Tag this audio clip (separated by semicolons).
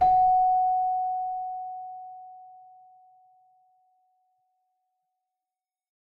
bell; keyboard; celesta; chimes